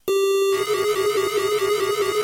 sample of gameboy with 32mb card and i kimu software
layer, game